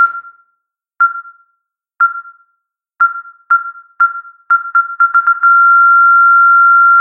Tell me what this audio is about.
ARNAUDEAU Mathieu 2019 2020 heartRateMonitor
I created this sound only using Audacity.
First of all, I generated a 1400 Hz tonality.
Then I duplicated it.
I selected the copy and trimmed it to 0.1 sec.
I used a fade out effect on it to create a beep sound.
After that, I duplicated the beep several times and reduced the time between each beep to 1 second.
Finally, I put the untrimmed tonality at the end to create a heart rate monitor beeping sound.